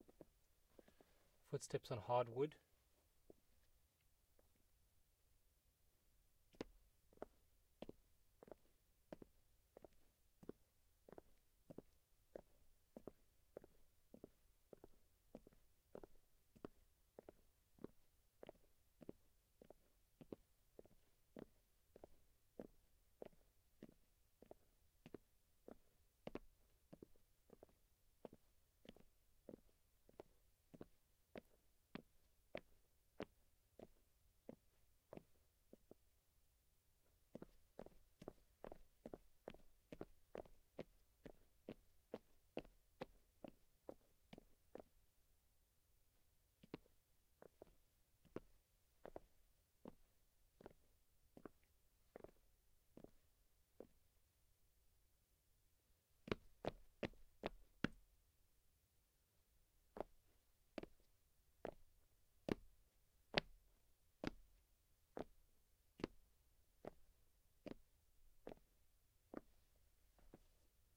footsteps wood solid